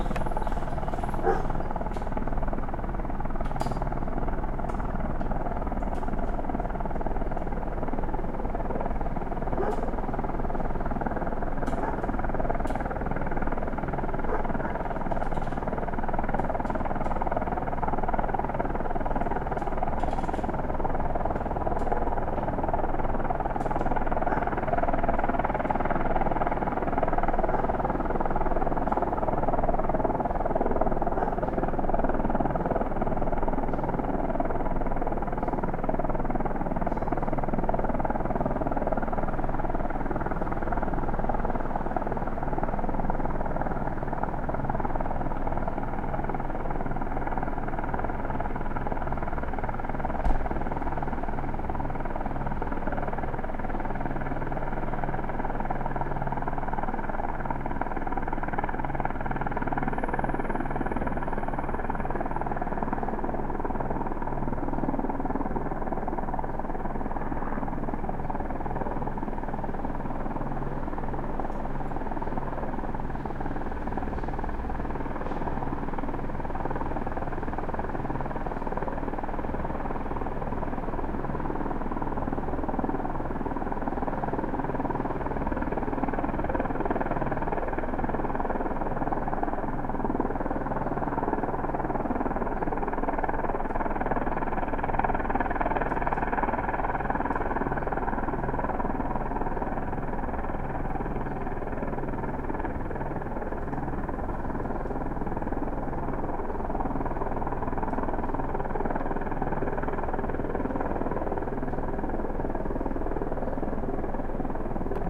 police helicopter hovering in distance with dogs barking